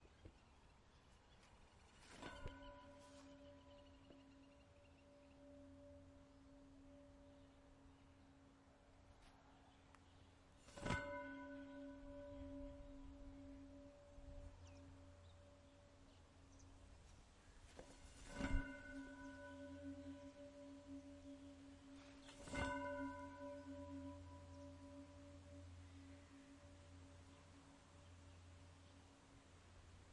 fence resonance
fence, metal